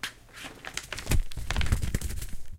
Shaking of a beef jerky bag, I think the packet that is supposed to keep it dry is thrown from side to side. Recorded very close to two condenser mics. These were recorded for an experiment that is supposed to make apparent the noise inherent in mics and preamps.
food-package, beef-jerky, crinkle, plastic